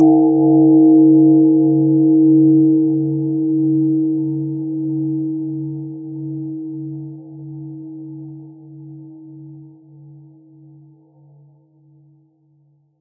temple bell 002
Sound of a 1200 lbs. Japanese Temple Bell. Cast in 1532 and made of bronze. Recording from 2023 New Years Public bell ringing ceremony. San Francisco. medium receding tone. Mono
Buddhist, Japanese, bell, church, meditation, prayer, temple